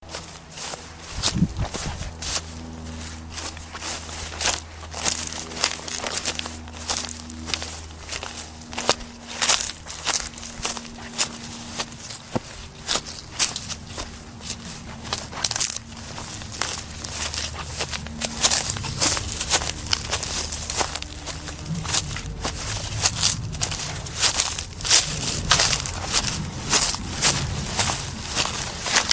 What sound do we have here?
Footsteps, Dry Leaves, A
Raw audio of footsteps through dry crunching leaves in a garden, with some background ambience including a passing airplane.
An example of how you might credit is by putting this in the description/credits: